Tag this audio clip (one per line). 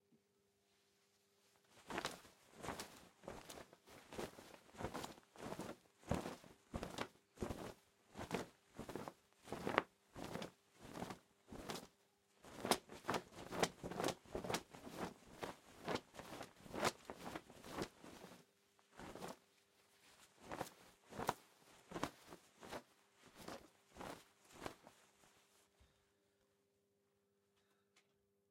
Clothes
Flapping
OWI
Wings
Wings-flapping